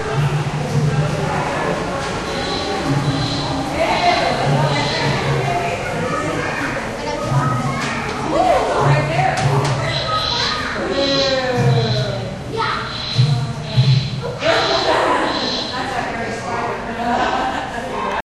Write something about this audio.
Walking through the Miami Metro Zoo with Olympus DS-40 and Sony ECMDS70P. Inside a rain forest exhibit.